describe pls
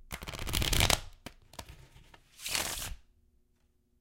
Card Shuffle
shuffling a deck of cards
card-shuffle, shuffle, cards